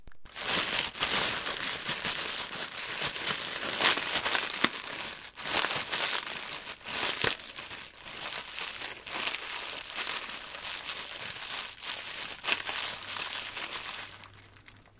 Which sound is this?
Plastic bread wrapper being crinkled.